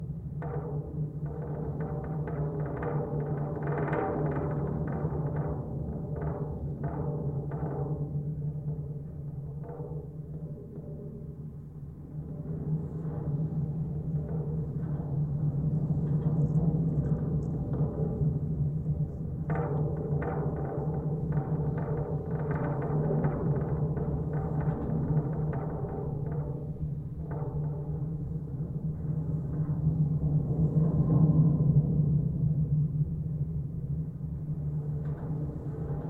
GGB 0303 suspender NE10NW
Contact mic recording of the Golden Gate Bridge in San Francisco, CA, USA at NE suspender cluster 10, NW cable. Recorded February 26, 2011 using a Sony PCM-D50 recorder with Schertler DYN-E-SET wired mic attached to the cable with putty.